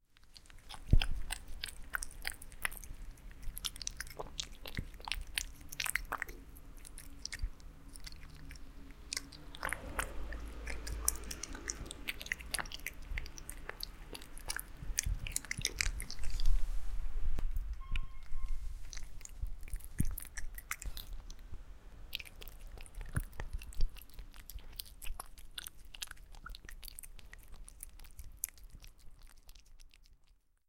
cat eating01
kitten, cat, kitty, pet, animal, smack